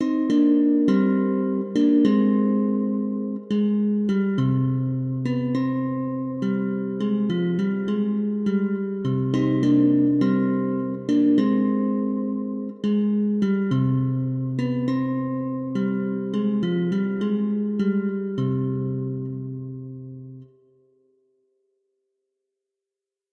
jazz guitar
Created a simple little melody of sampled guitar notes with my music production software.
clean-guitar, sampled-guitar-melody, jazz-guitar